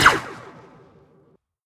blaster shot single 5
Star wars blaster shot